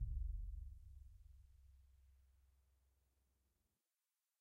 Symphonic Concert Bass Drum Vel02
Ludwig 40'' x 18'' suspended concert bass drum, recorded via overhead mics in multiple velocities.
symphonic, orchestral, concert, drum, bass